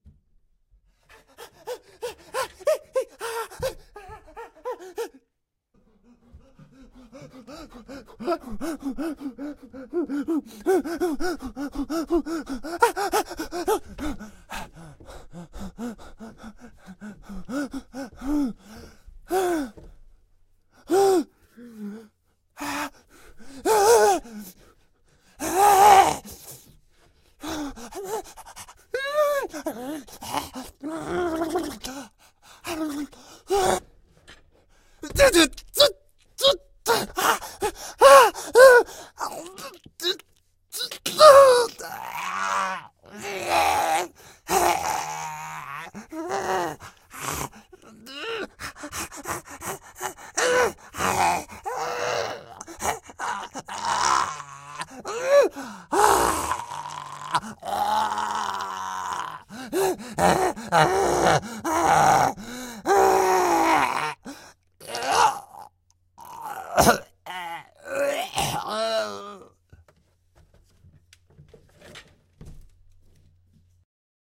Male screaming very close to the mic
Own personal scream I did for my movie Rain Machine
It's magical to add secretly your own voice to an actor
crazy, falling, pain, yell